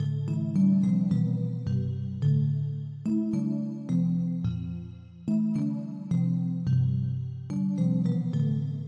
Mystery Bells Synth 02 (108Bpm Gmin)
bell, bells, mystery, synth